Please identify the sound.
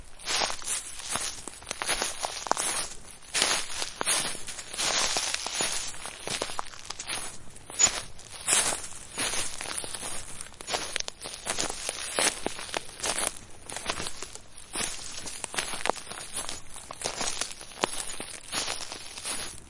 Footsteps in the forest
Just Walking around in the forest :-)
walking, footsteps, grass, leaves, steps, nature, walk, foot, forest